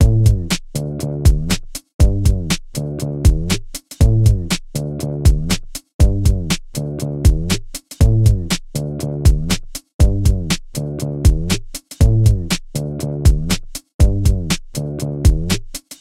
Bass loops 086 with drums short loop 120 bpm
120, 120bpm, bass, beat, bpm, dance, drum, drum-loop, drums, funky, groove, groovy, hip, hop, loop, loops, onlybass, percs, rhythm